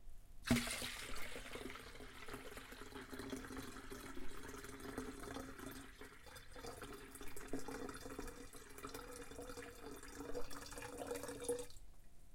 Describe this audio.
Heavy Long Pour FF120
Long, heavy liquid pour. low pitch, continuous, smooth tempo.
long-pour, Pouring